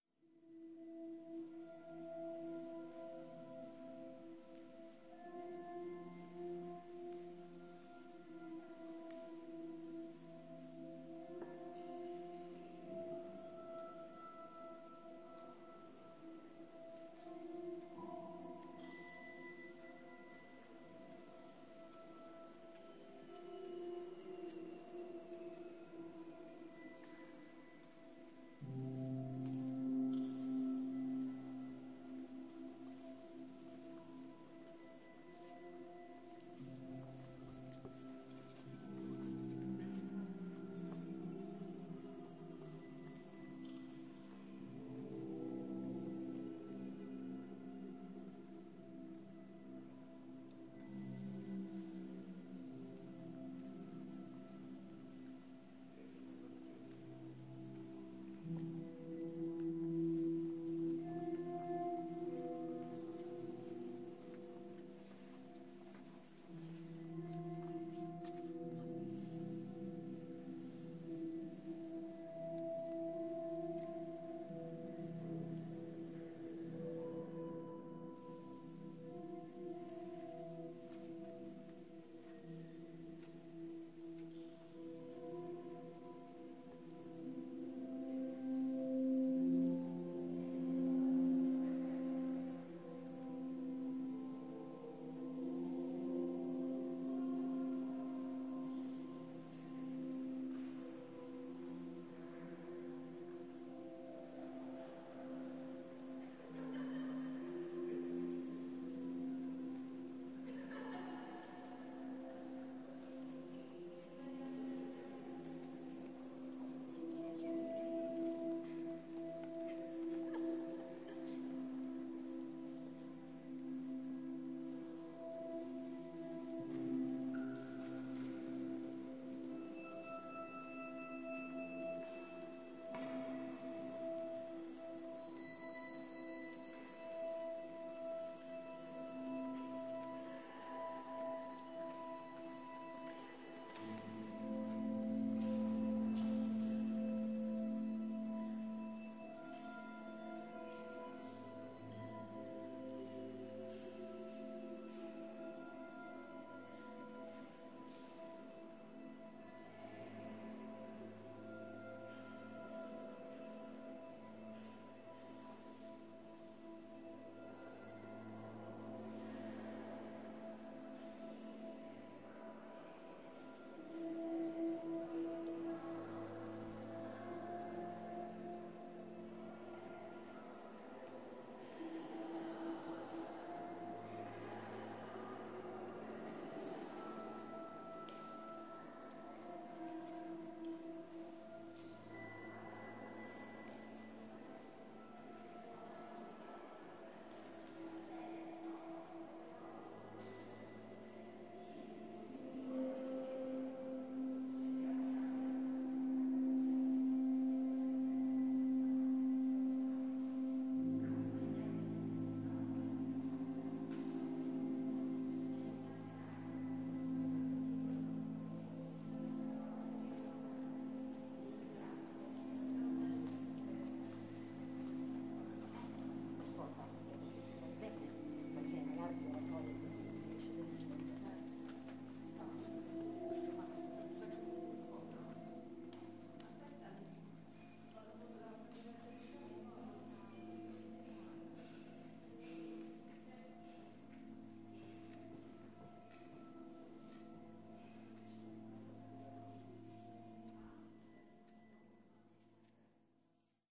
... crossing the great gallery at venaria real with eno soundtrack...